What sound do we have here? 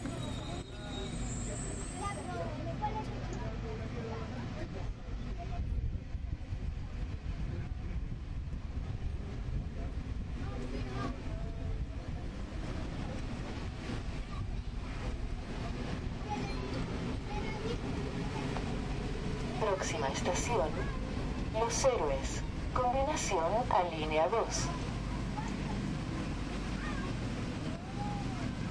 metro announcement los heroes
Crowd and PA announcement in Metro train, Santiago Chile. Zoom.
announcement, Chile, Metro, PA, Santiago, subway